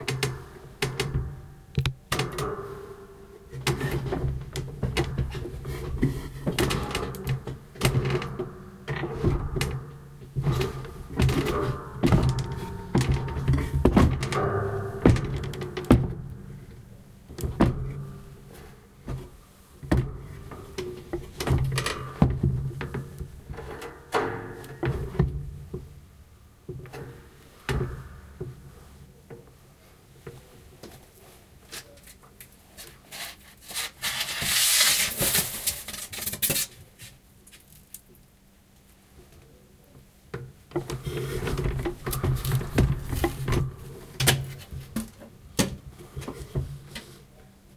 Attic Door Springs 2 (climbing the ladder)
The springs on this ceiling door were super metallic so I wanted to record them, sorry for any time I touch the mic!
metal, close, wood, foley, wooden, attic, thump, door, resonant, springs, squeak, open, metallic, creak